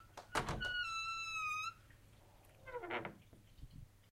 A creaking wooden door (with metal hinges.) This sound is a short fairly high-pitched creak with a lower ending. Recorded in stereo using a H2n.